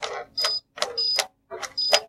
MOTTE Adrien 2017 2018 CoffeeMachineBip
I recorded the sound from the buttons of a coffee machine. I normalized it to 0db and I removed the background noise. I did a fade in and a fade out too.
Typologie de Schaeffer :
C'est un enregistrement avec des impulsions complexes (X') et des impulsions toniques (N').
Masse : groupe nodal
Timbre Harmonique : acide
Grain : Lisse
Dynamique : bip violents
Profil mélodique : variations glissantes
Profil de masse : site
bip, coffee, machine